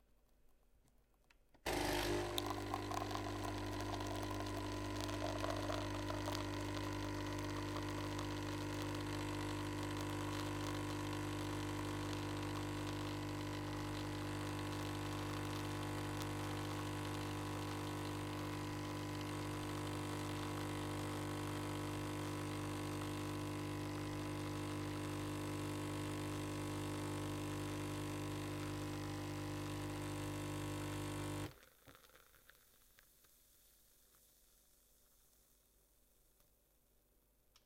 Coffee Machine Motor
Noisy motor from my coffee machine, recorded with a Rode NTG4+ shotgun microphone.
Buzz, Coffee, Design, Electronic, Field-Recording, Machine, Motor, NTG4, Rode, Sound, Zoom